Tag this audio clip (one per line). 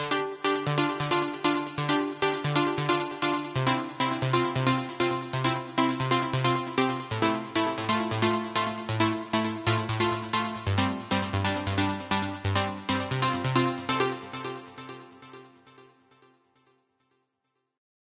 keys
house
trance
uplifting